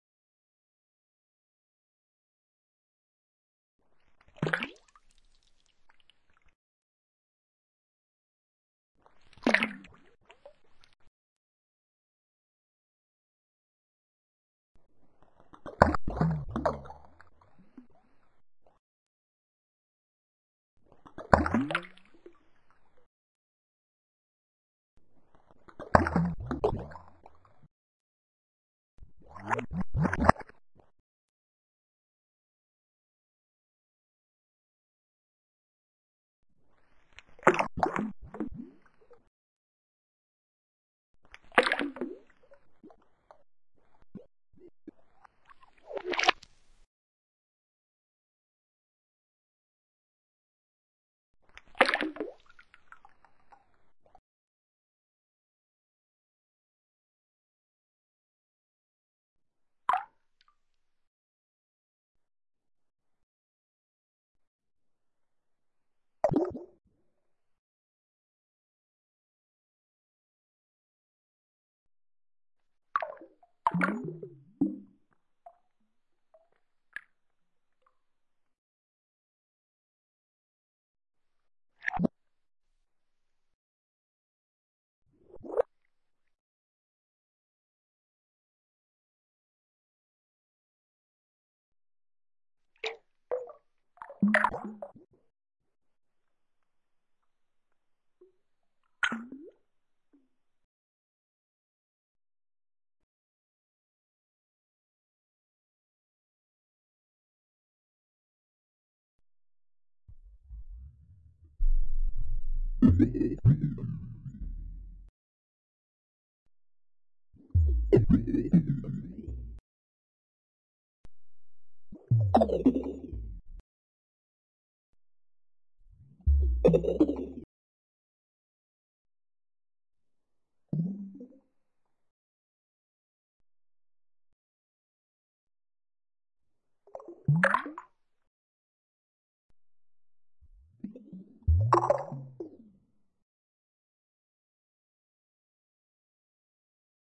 water drips dripping slowed reverse
drip, dripping, drips, drops, reverse, slowed, water